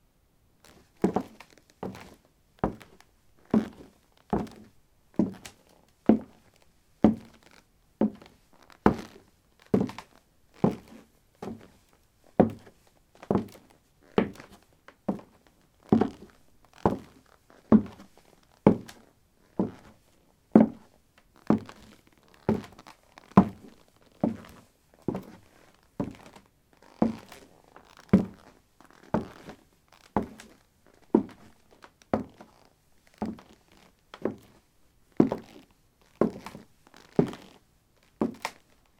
wood 18a trekkingboots walk
Walking on a wooden floor: trekking boots. Recorded with a ZOOM H2 in a basement of a house: a large wooden table placed on a carpet over concrete. Normalized with Audacity.